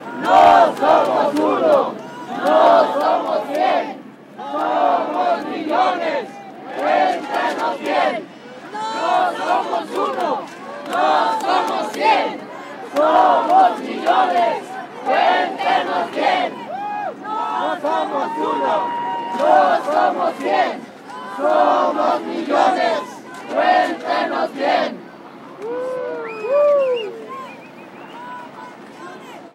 Demonstrators chanting. May Day immigrants rights demonstration in Chicago. Recorded with Sennheiser MKE 300 directional electret condenser mic on mini-DV camcorder. Minimal processing, normalized to -3.0 dB.
chanting, city, crowd, environmental-sounds-research, field-recording, human, political, voice